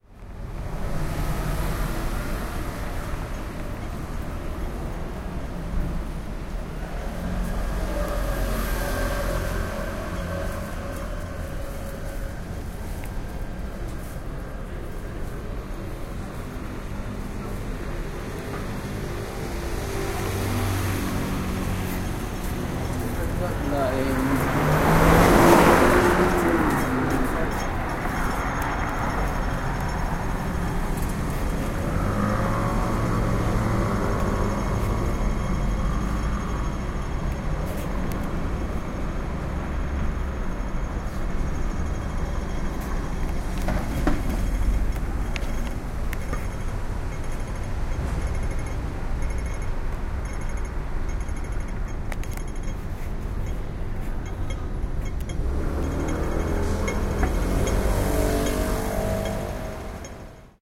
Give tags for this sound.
Macau; Field-Recording; University-of-Saint-Joseph; Soundscape

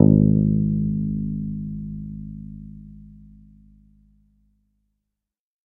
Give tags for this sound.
bass; electric; guitar; multisample

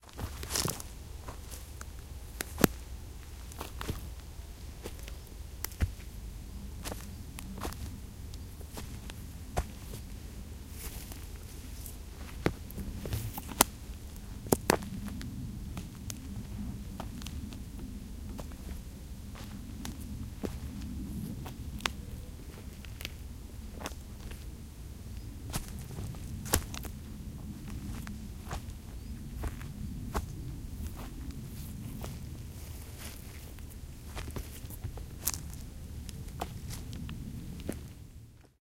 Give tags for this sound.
Footsteps; forest; twigs

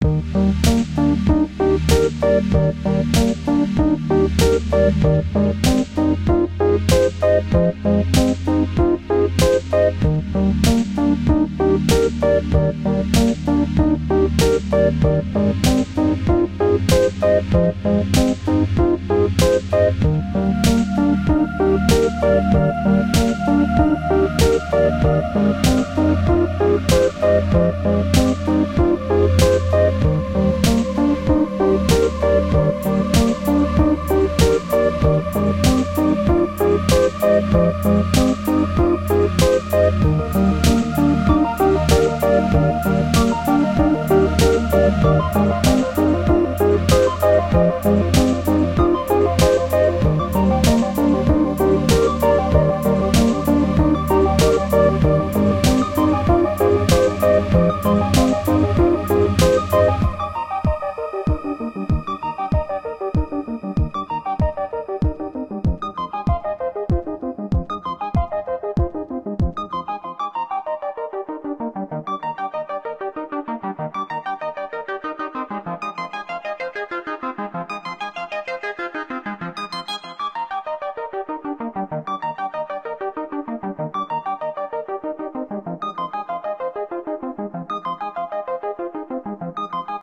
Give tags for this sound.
analog; arturia; dance; digital; edm; electro; electronic; experiemental; happy; hardware; house; korg; light; loop; novation; synth; synthwave

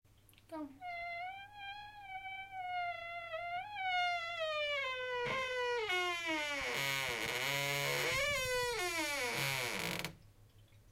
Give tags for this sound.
Creaky; Creak; Hinges; slow; Wooden; Creaking; Stereo; closing; long; Spooky; Door; High-pitched

